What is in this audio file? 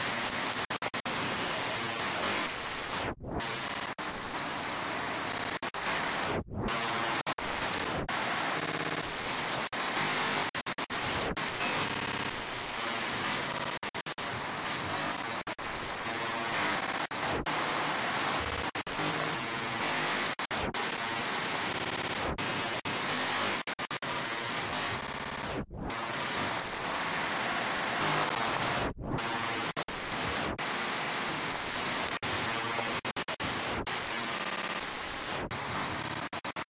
tb field rain
One in of a set of ambient noises created with the Tweakbench Field VST plugin and the Illformed Glitch VST plugin. Loopable and suitable for background treatments.
Ambient, Field, Glitch, Illformed, Noise, Tweakbench, VST